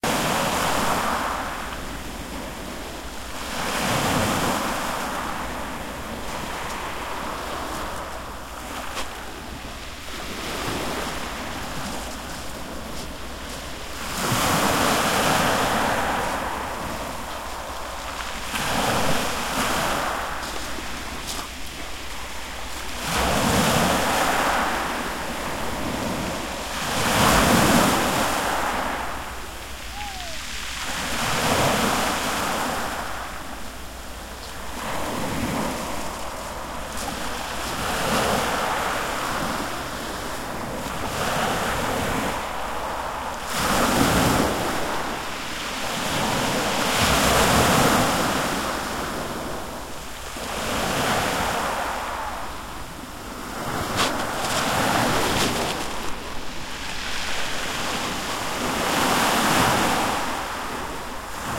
Small Waves crashing on a on shale beech with nearby footsteps and people. Recorded using a TASCAM DR-05 with wind muffler. Both ends left open for your own editing fade. On playback, there seems to be a very high pitched 'tick' in places. This is NOT part of the original recording and is NOT included when 'Downloaded'. I think this must be a slight fault on the web site.